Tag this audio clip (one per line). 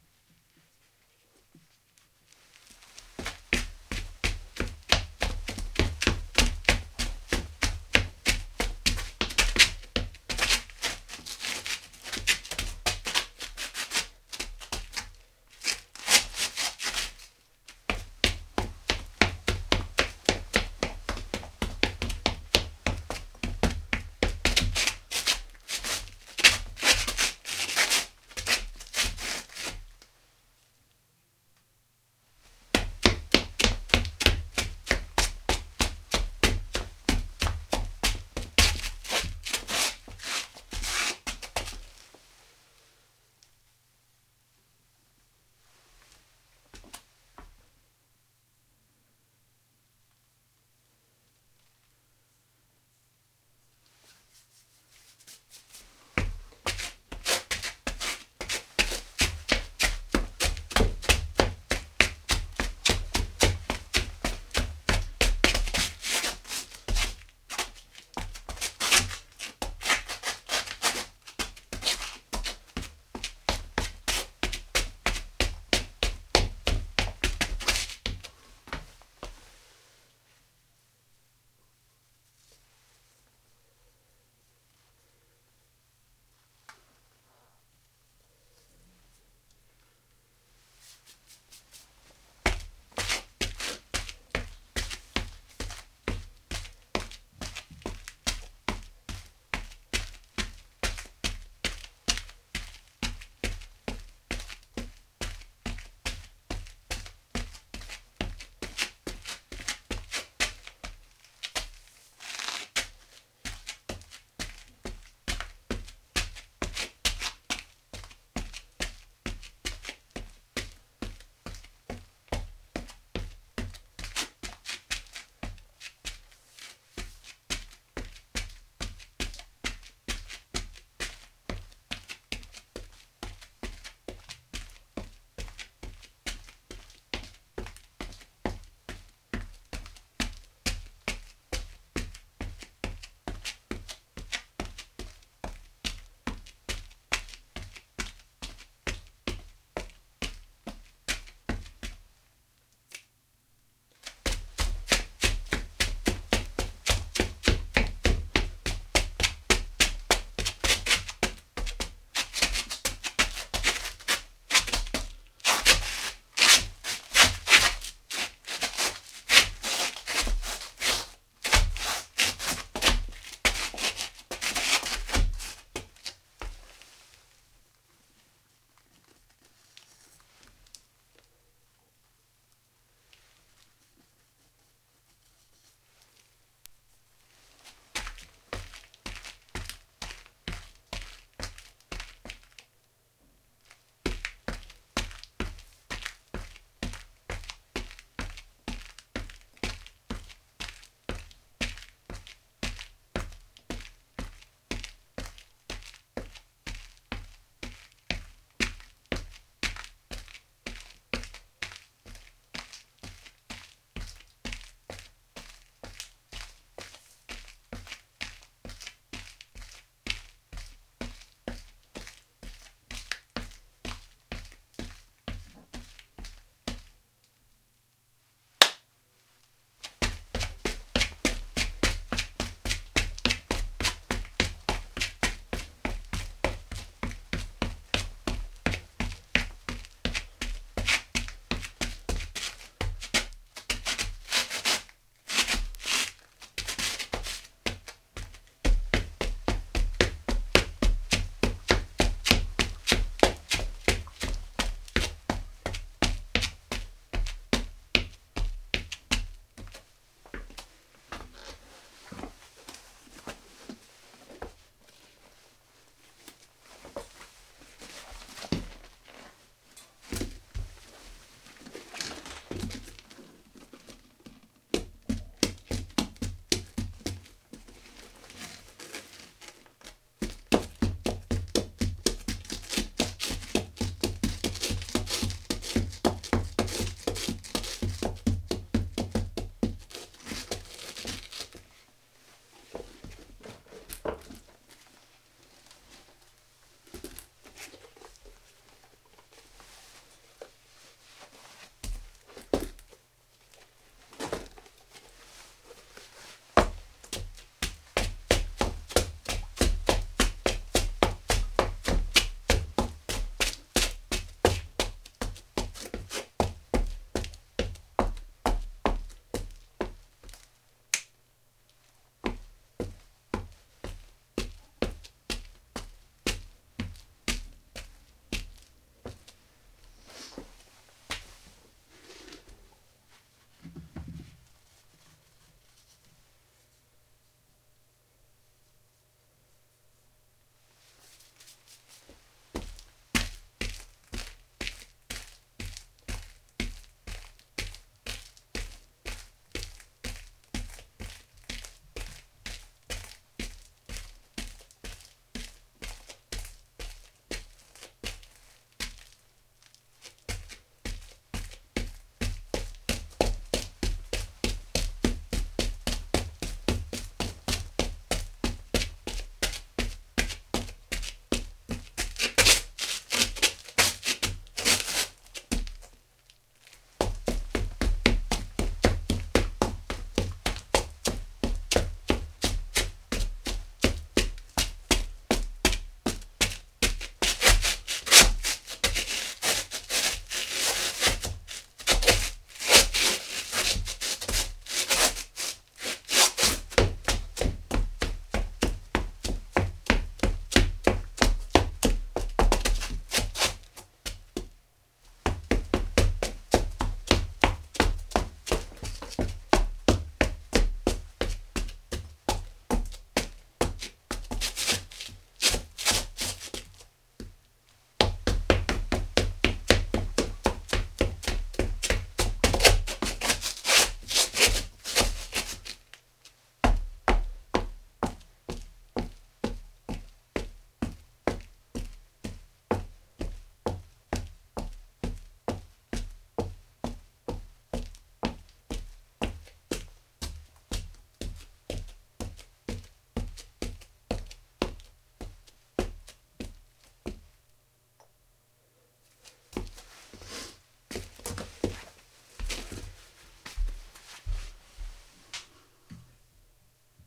feet
foot
footsteps
gravel
outside
shoe
shuffle
steps
street
walk
walking